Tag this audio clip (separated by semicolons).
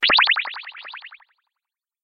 FX; sound-desing; indiegame; Sounds; effects; SFX; Gameaudio